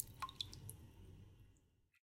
water drop-06
hand-made water drops on water of a sink
three small drops
recorded with sony MD recorder and stereo microphone
drops, water